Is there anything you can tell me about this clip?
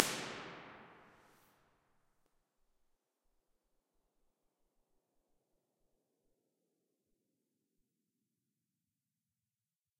Finnvox Impulses - EMT 1,5 sec
convolution, Finnvox, impulse, ir, response, reverb, studios